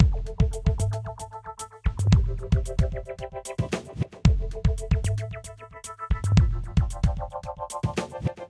Created with a miniKorg and Reason for the Dutch Holly song Outlaw (Makin' the Scene)